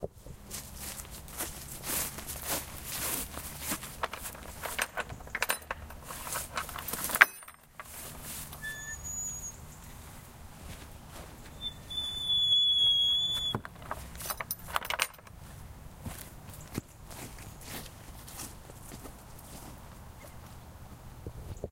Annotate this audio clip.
furtka2,2020hawick
I recorded this while searching for farm sounds. On the recording you can hear footsteps in the grass, then the opening of the latch in the gate to the field and then further steps. This short recording was made during a sound search in the Scotish Border area of Scotland. I recorded on the Zoom H5 handy recorder, I used Superlux headphones. Sound recording on SanDisk ultra 32Gb card. Enjoy and have a great time for everyone. Best regards :)